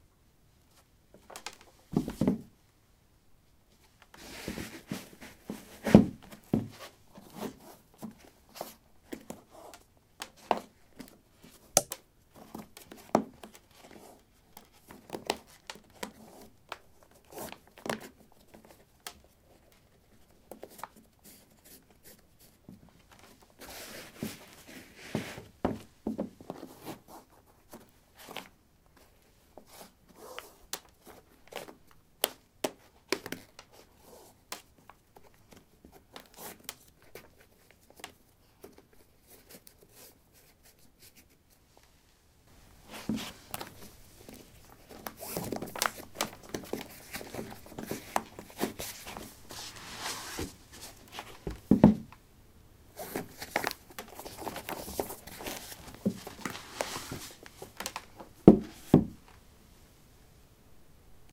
wood 16d trekkingshoes onoff
Putting trekking boots on/off on a wooden floor. Recorded with a ZOOM H2 in a basement of a house: a large wooden table placed on a carpet over concrete. Normalized with Audacity.